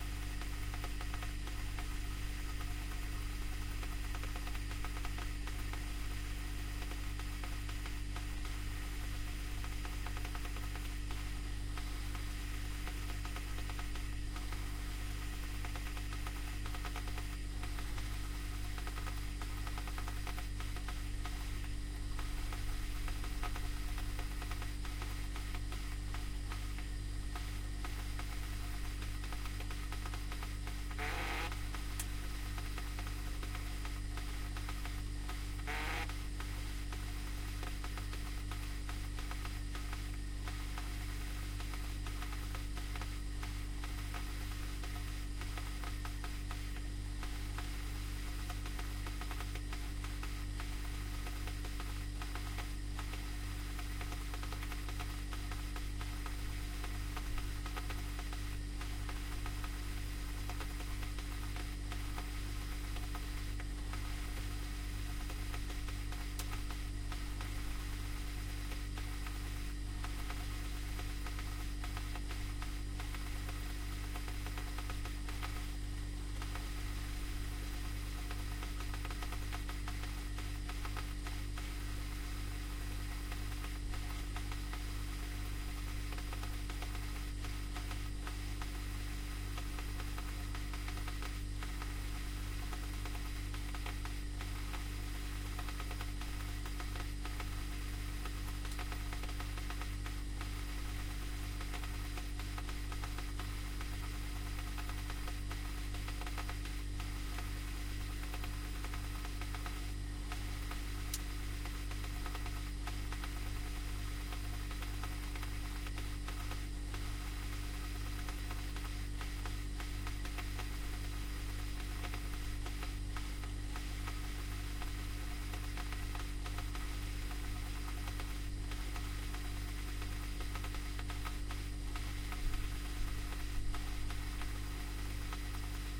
fridge interior
a recording made inside a Miele refrigerator.
EM172-> ULN-2.
appliance, appliances, fridge, household, interior, internal, kitchen, recording, refrigerator